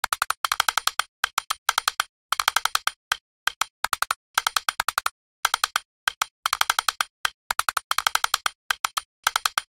typing with mechanical fingers
Typing with metallic fingers. Why do all the work when your robot can do it for you? Created using a wooden stick hitting different plastic and metal materials, then layered and matched to a typing cadence.
android, droid, keyboard, robot, typing